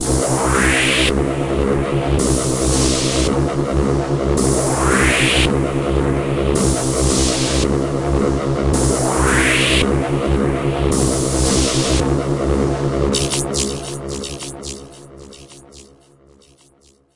a (com)pressing sound with some white noise that flies by. Made with Ableton.